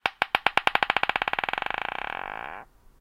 two heavy-bottomed cocktail glasses reacting to gravity